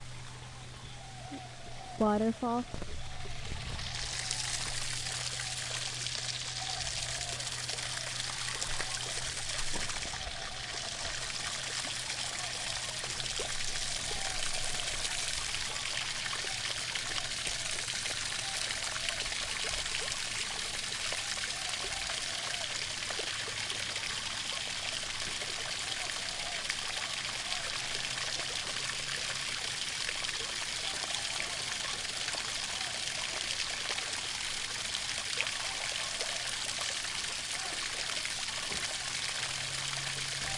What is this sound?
Small Waterfall
Loud waterfall, sounds like a waterhose shooting water into a pond
river, water, waterfall, waterhose